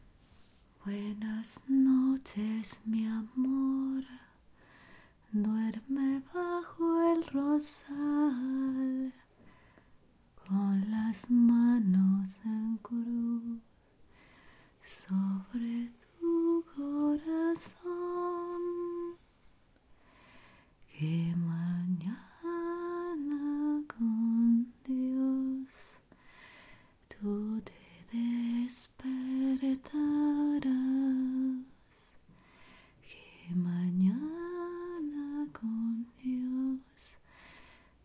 Soft lullaby in Spanish. Could suit horror story.